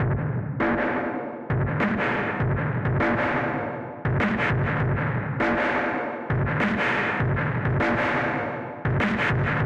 simple distorted drumloop